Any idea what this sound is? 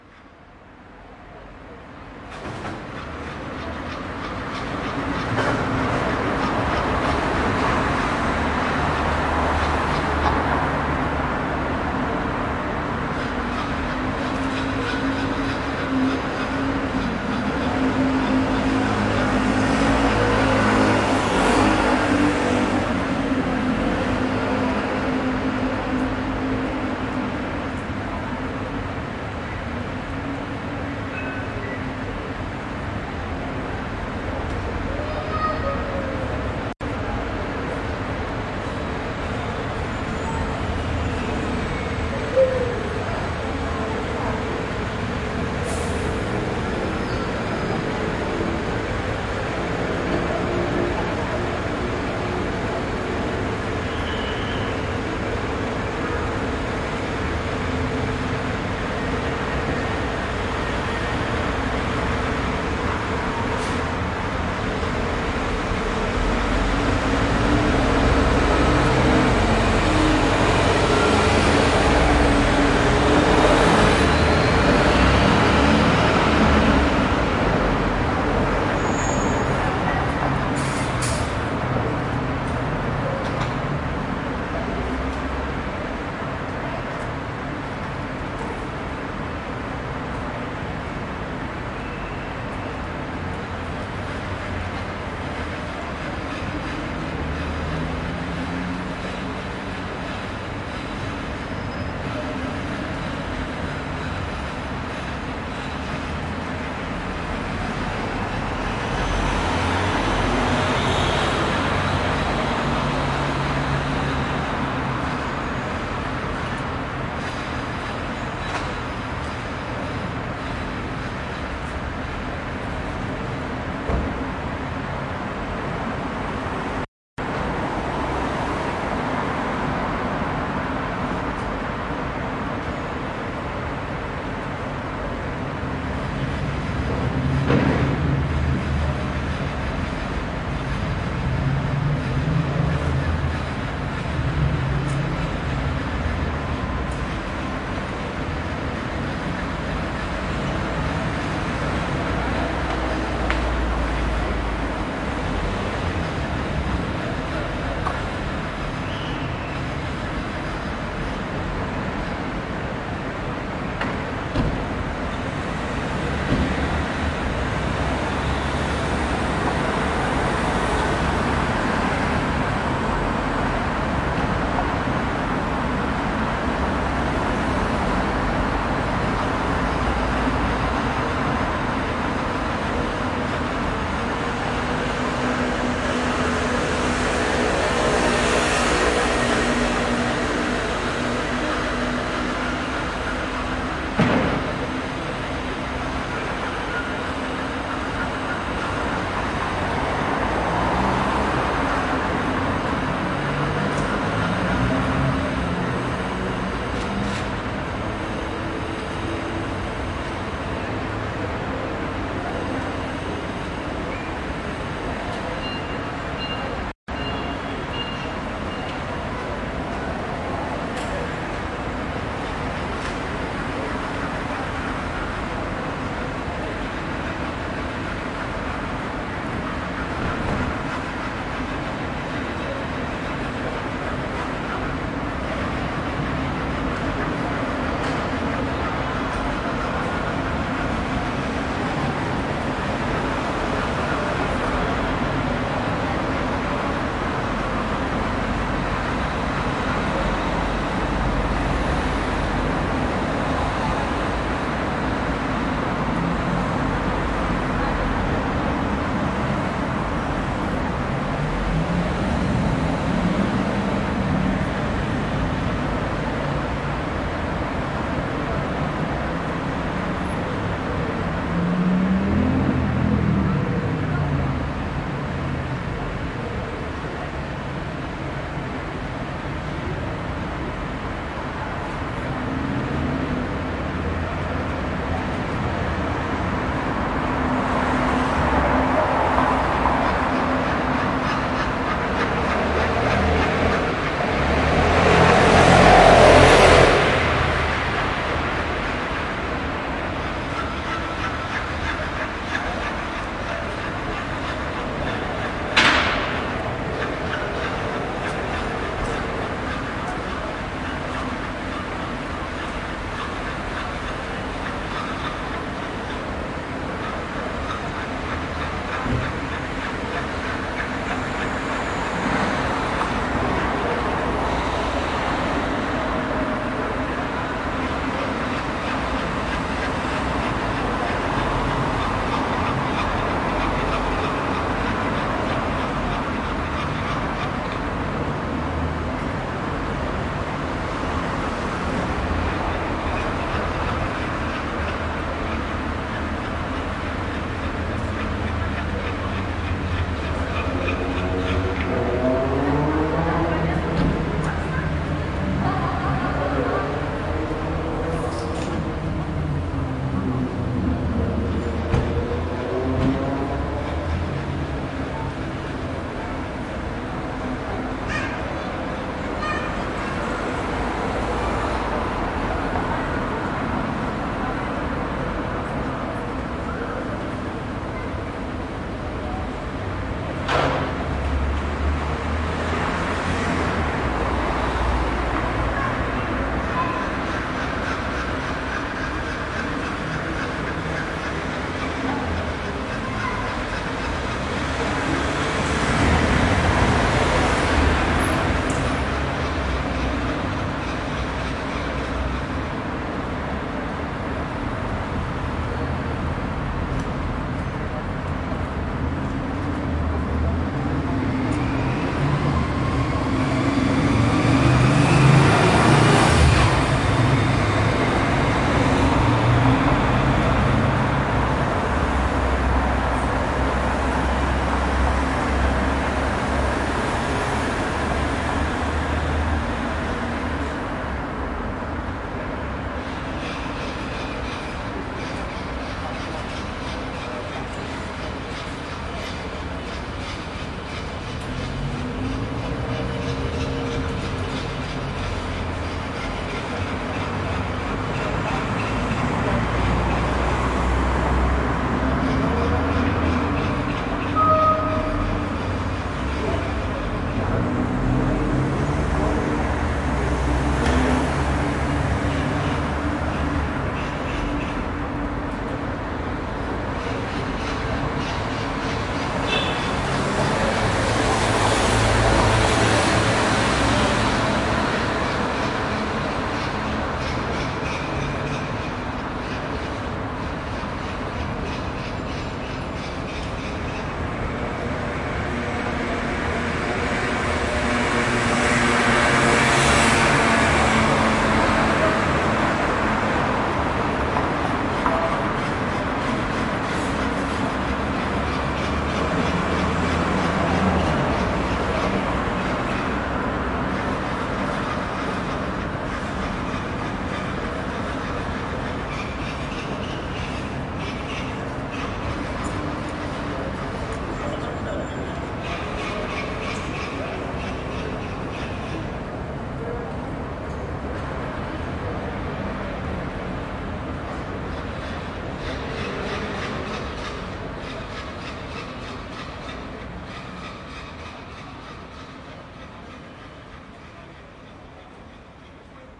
#BankSound -Traffic of Paris
city, street, cars, ambience, Paris, people, traffic, noise